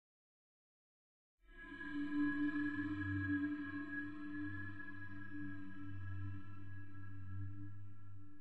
Another Sound
abstract, creepy, effect, title, card, sound, sinister